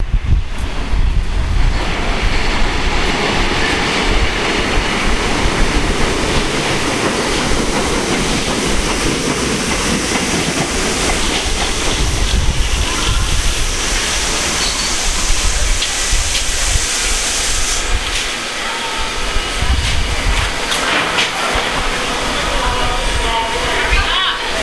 The sound of a train entering an outdoor above ground station.
subway, train, arrive